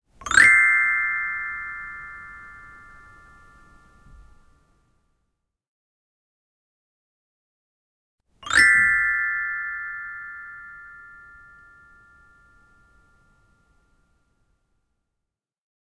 Xylophon - Glissando hoch
Upwards glissando played on a small xylophone